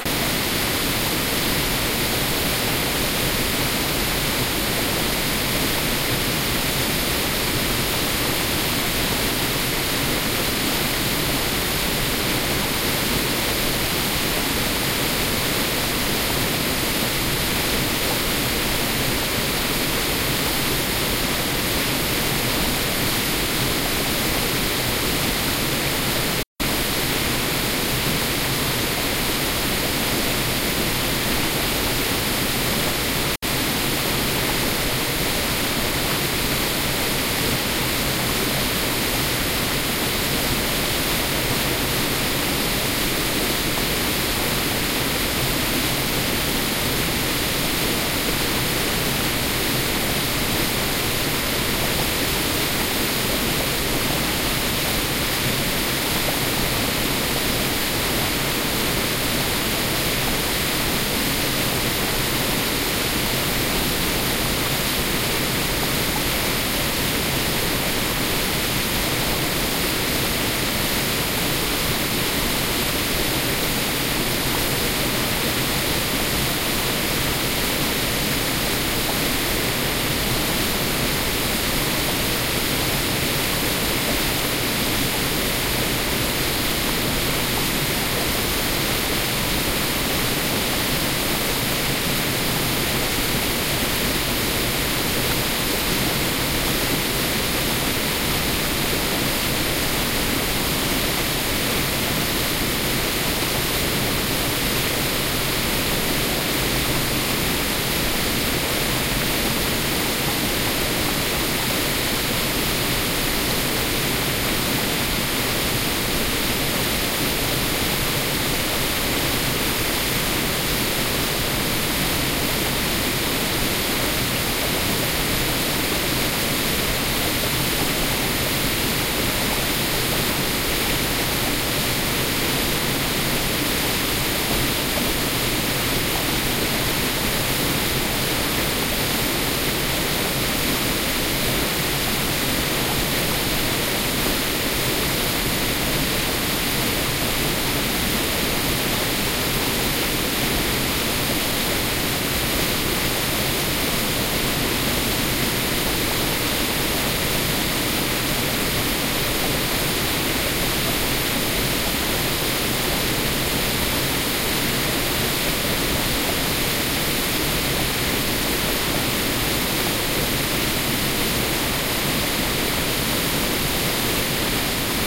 Waterfall in the Harzmountains
The Harzmountains
are some hills in the north of Germany, covered mostly with pinetrees.
Some of these streams are manmade, to drive the mining machinery s.th.
like 400 years ago. This waterfall is part of that network.This
waterfall was recorded on the 1st of September 2007 with a Sharp MD-DR
470H minidisk player and the Soundman OKM II binaural microphones.